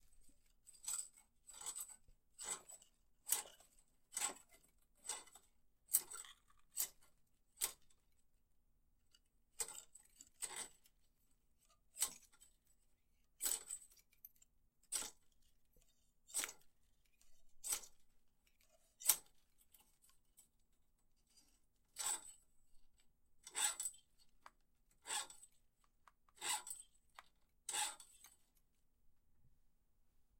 metal wires scraping
guitar strings scraping
metal, scrape, scraping, steel, ting, wires